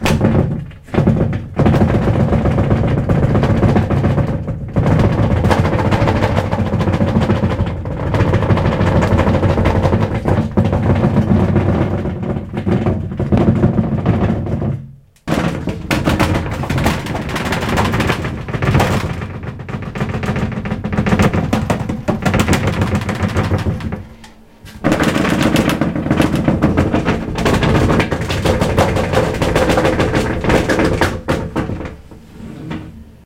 I'm shaking a closet and the stuff inside shakes along. Recorded with Edirol R-1 & Sennheiser ME66.